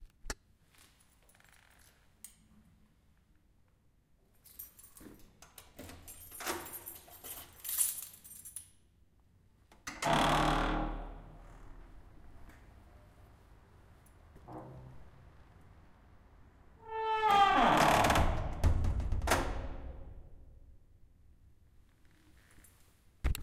Door squeak 3
Heavy steel door opening, closing and locking. With beautiful squeak that gives you the creeps. This time with keys to open the door.
Recorded with Zoom H1
lock, Door, Heavy, squeak, prison, Open, jail, Shut, keys, Close, locking, Metal